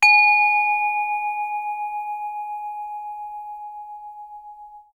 Small vietnamese sound bowl. Lots of beating waves and gritty sound in the tail.